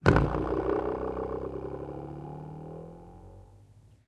Door Stop Twang V01
One of those springy door stops that are fitted to the skirting board to stop the door hitting the wall. Always thought it would make a good sound to record!
Recorded on an ipod touch with a blue mikey microphone on blue fire app, Edited on cubase - just normalised and shortened with fades.